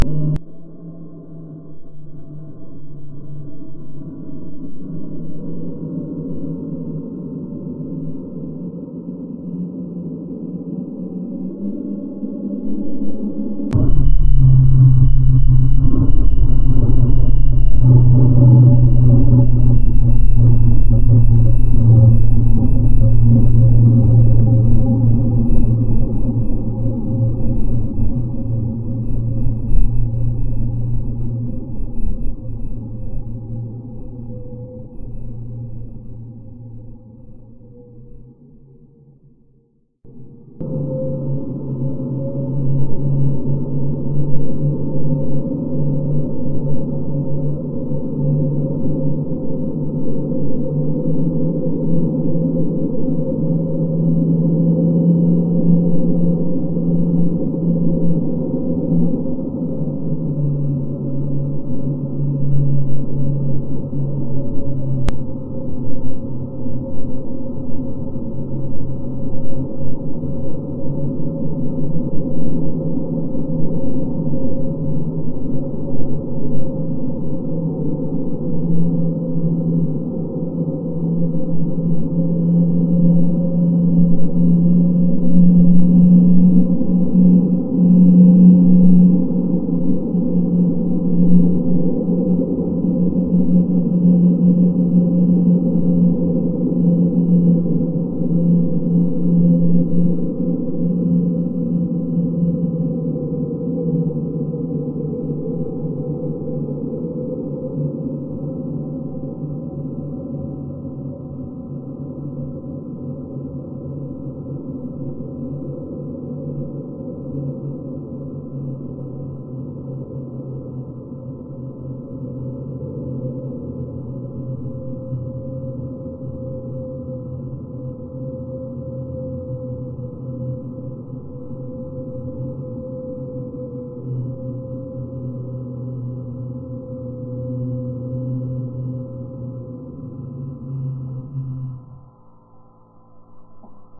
I've created the raw material by blowing, mouth Close to microphone, Then I ran the 5 second long recording through edit7speed several times until signsl was 20 seconds long. I selected only a part of the new result and amplified 400%. After that I ran the signal through doppler filter Three times, amplofied again and cut out the best part of the resulting signal. Now you get the illusion of a large jet Aircraft passing at low altitude for landing.
faked, jet, landing, liner